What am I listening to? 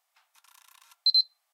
sl50 focus lock
Samsung SL50 camera noises
samsung, focus, zoom, photo, click, camera, sl50, beep